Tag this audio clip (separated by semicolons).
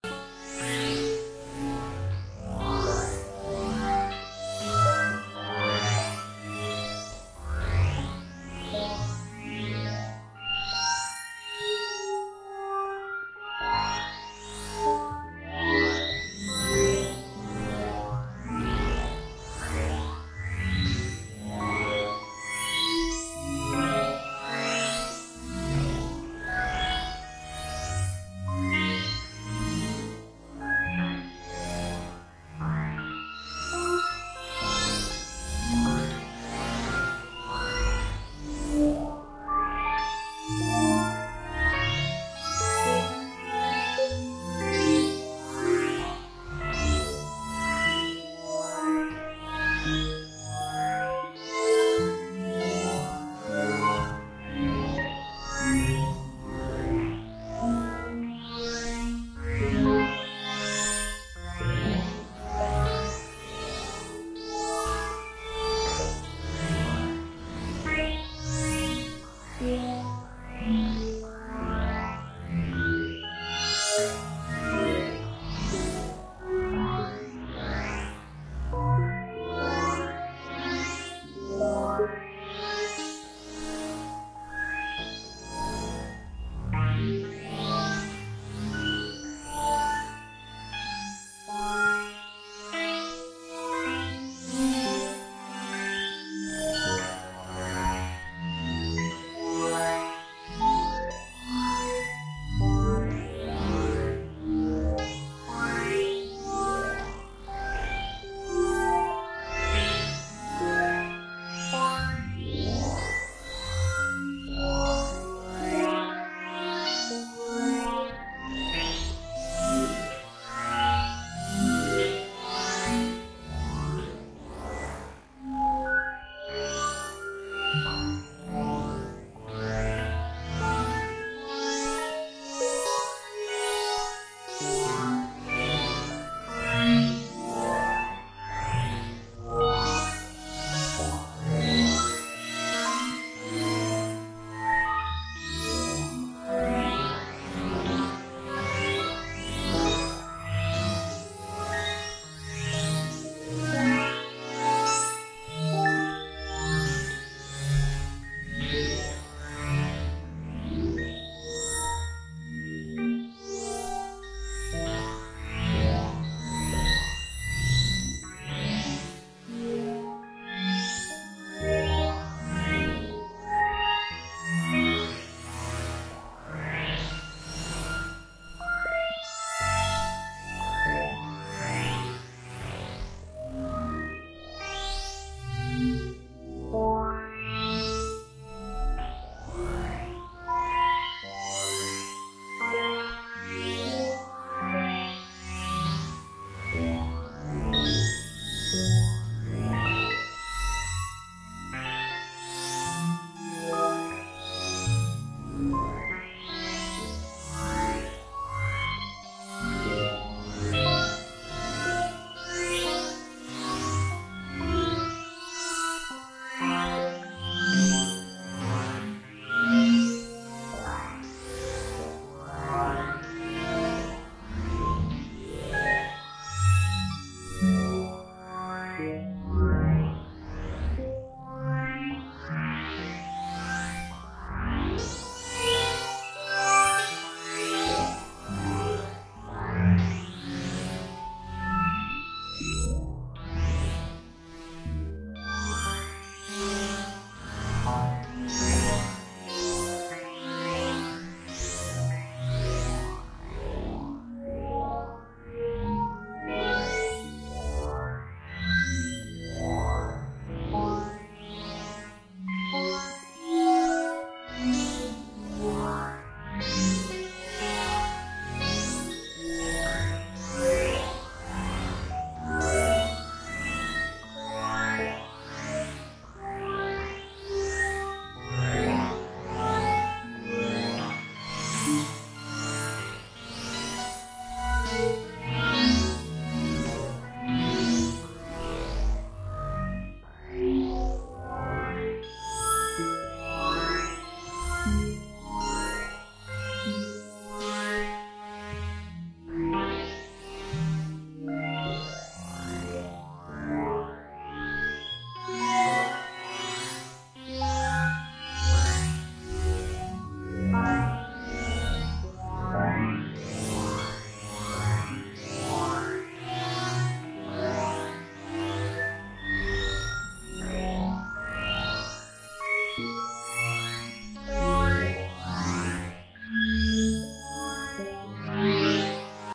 ambient; drone; eerie; evolving; experimental; pad; soundscape; wave; waves